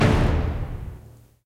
BRAAMS HIT - 2
braams, cinematic, dramatic